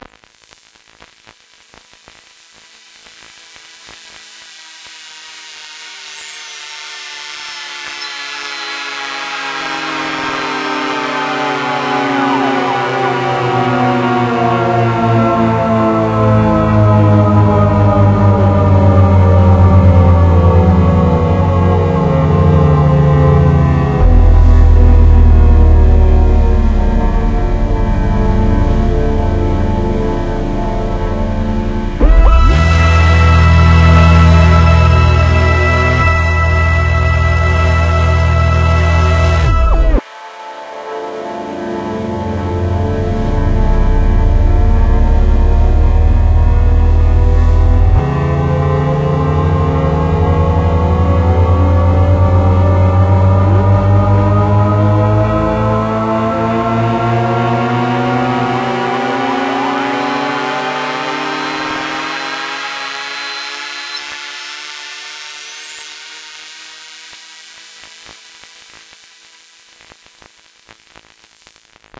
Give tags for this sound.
Jordan Jordan-Mills symmetry angels ambiance Continuum-5 mojomills ambience mojo Mills

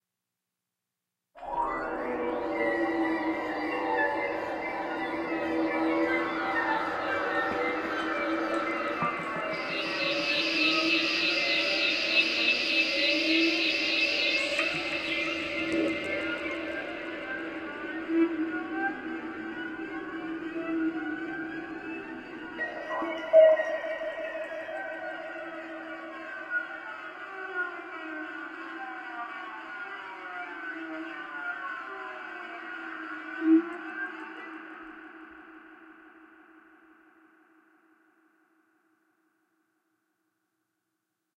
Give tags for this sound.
gr-33 guitar halloween nightmare roland scary

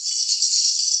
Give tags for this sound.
rattling rattle shaker shake shaking

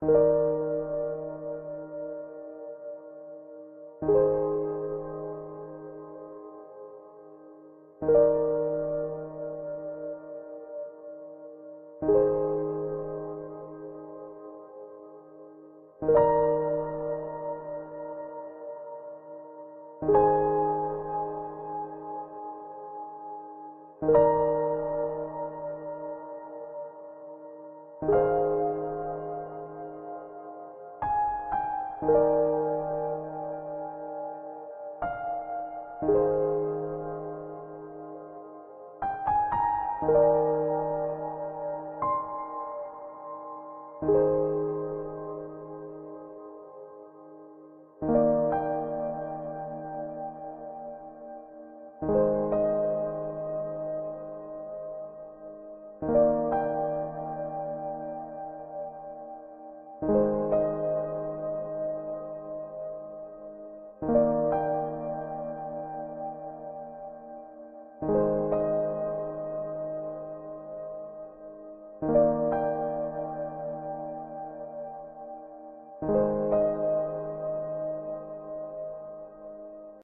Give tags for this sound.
calm 120-bpm Piano Ambiance atmosphere Loop

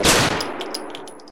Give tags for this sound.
beretta field-recording gun-shot pistol shot

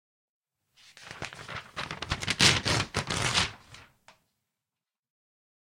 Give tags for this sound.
calendar ripping

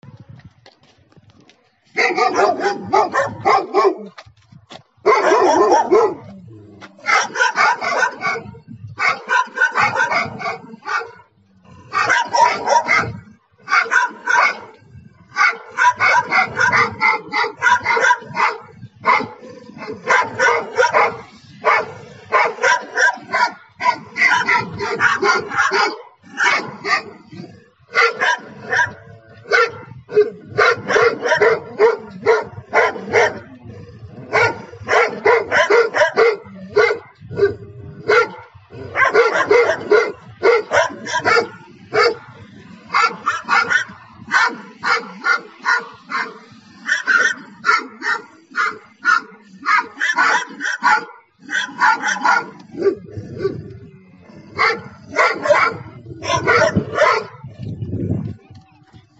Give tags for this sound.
BARKING DOGS

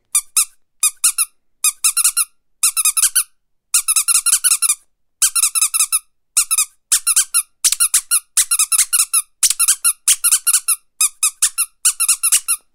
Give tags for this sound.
duck rubber squeek

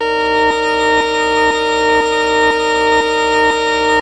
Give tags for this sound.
furniture-music meditation minimal synthetic

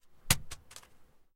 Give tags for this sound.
paper down ball floor

